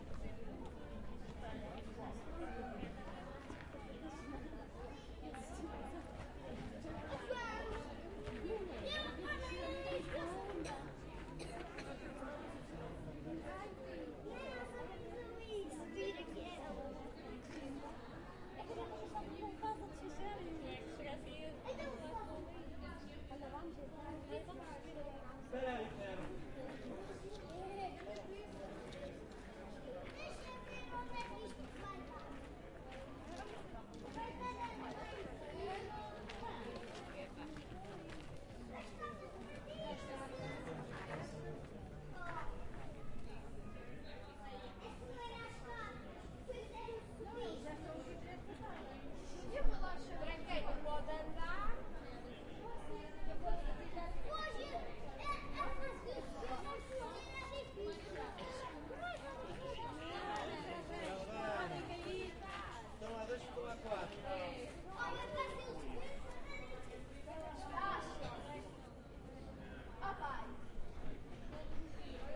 castleguimaraes ambience2
Recorded in a Sunday in the Castle of Guimaraes, Portugal. Turists talking in portuguese. The walls of the castle provide a great ambience.
castle, crowd, field-recording, portuguese, soundscape, talking, voices